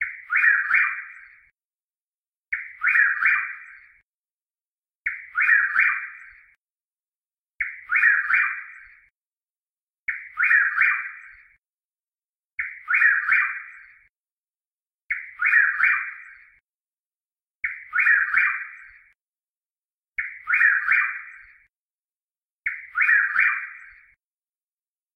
Birdsong of the Chuck-will's-widow, a nocturnal bird that begins to sing at dusk. Recorded near Chattanooga, Tennessee in my back yard at edge of woods. It was captured on a Zoom H1 Handy Recorder and edited with Audacity.
forest, field-recording, nature, birdsong, night, birds